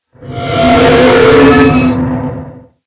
Again, this is a plastic soda straw in a 32-oz. plastic soft drink cup being scraped up and down in the lid, in my quest for a Godzilla/Cloverfield gigantic roaring monster sound. The lower sounds are pulling the straw out and the higher sounds are pushing the straw in. Recorded with a Logitech USB mic and run through Audacity with gverb and pitch changes. Some of the tracks (there are about 4 or 5) are also reversed.